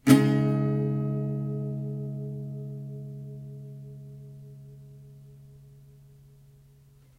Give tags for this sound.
acoustic
chord
guitar
scale
small
strummed

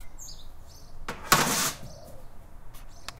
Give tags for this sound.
metal
tin
impact
slide
roof
grass

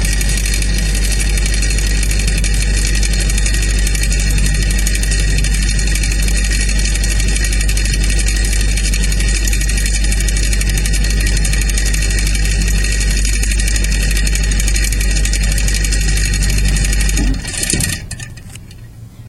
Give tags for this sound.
fridge
old
white

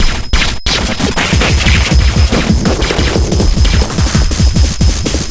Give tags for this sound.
deathcore
e
fuzzy
glitchbreak
h
k
love
o
pink
processed
small
t
thumb
y

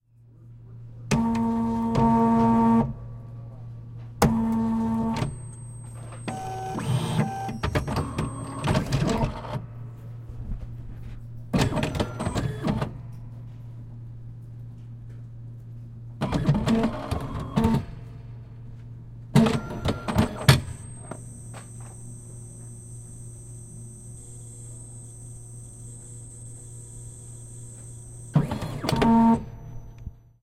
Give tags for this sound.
field-recording
industrial
machine
tape-binder

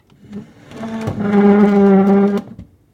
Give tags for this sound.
Ceramic
Drag
Dragged
Kitchen
Monster
Pull
Pulled
Push
Pushed
Roar
Snarl
Stool
Tile
Wood
Wooden

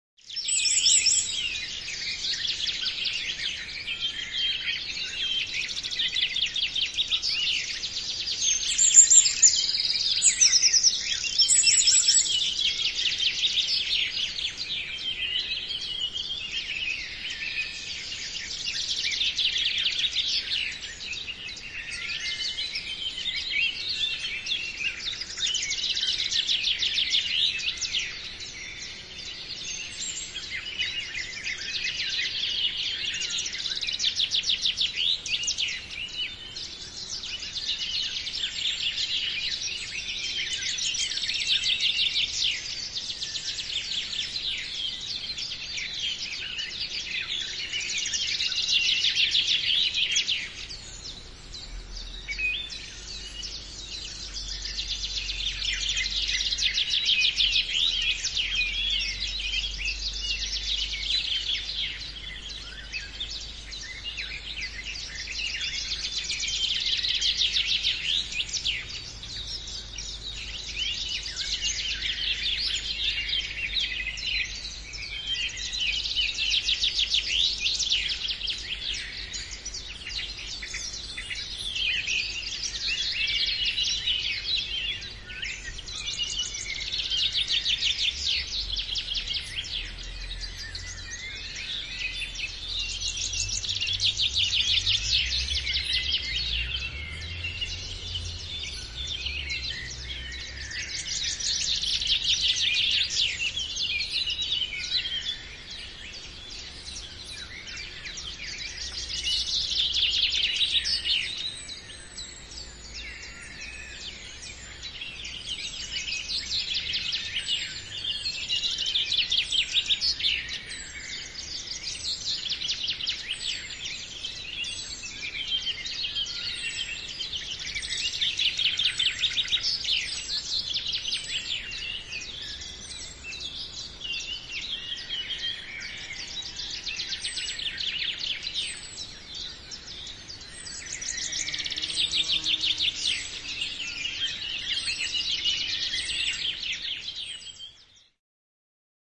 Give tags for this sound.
Birdsong
Eurooppa
Europe
Field-Rrecording
Finnish-Broadcasting-Company
Linnunlaulu
Soundfx
Tehosteet
Yle
Yleisradio

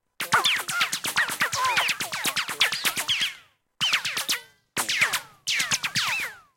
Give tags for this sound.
bullets
incoming
Ricochets

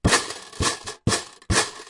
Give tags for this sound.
clatter
game
glass
mancala
metal
rhythm
swirl

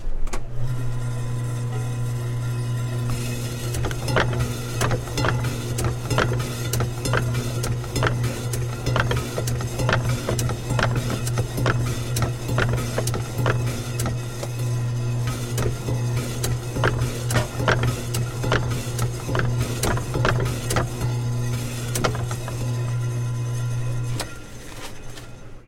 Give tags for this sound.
industrial
machine
slicer

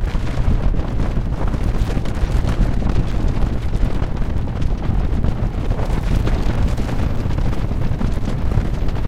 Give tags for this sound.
collapsing
earth
earthquake
landmass
loop
quake
rock
rumble
rumbling

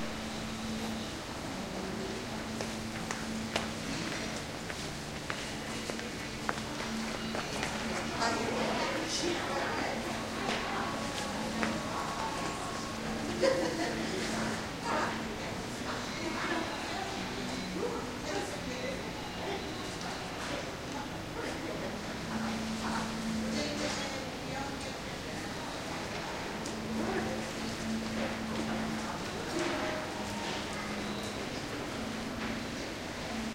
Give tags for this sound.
atmosphere
galleria
sweden
swedish

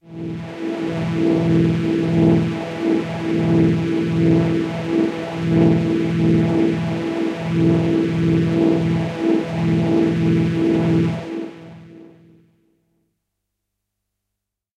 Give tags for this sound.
ambient
electronic
multi-sample
pad
space
space-pad
synth
waldorf

